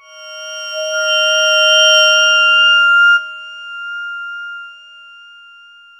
tubular system G#4
This sample is part of the "K5005 multisample 11 tubular system" sample
pack. It is a multisample to import into your favorite sampler. It is a
tubular bell sound with quite some varying pitches. In the sample pack
there are 16 samples evenly spread across 5 octaves (C1 till C6). The
note in the sample name (C, E or G#) does not indicate the pitch of the
sound. The sound was created with the K5005 ensemble from the user
library of Reaktor. After that normalizing and fades were applied within Cubase SX.
multisample, reaktor, bell, experimental, tubular